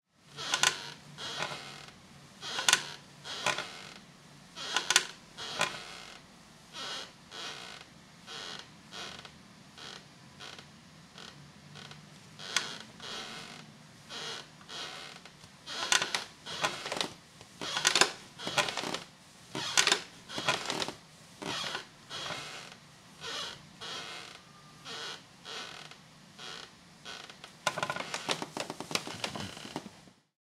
An old rocking chair in movement on the wooden floor of an apartment in Montreal.
rocking, crack, chair, squeek